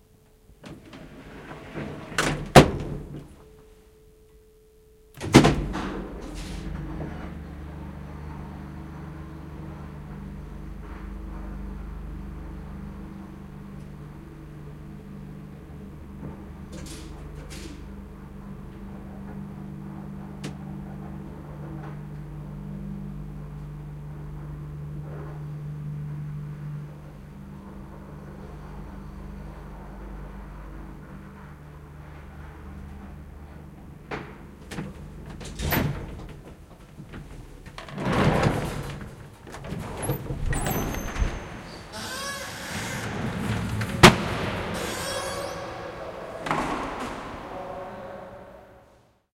Take this elevator to visit the music technology group.